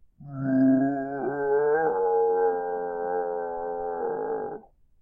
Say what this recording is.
Zombie Die 2
Sound of a dying zombie
Death, Die, Zombie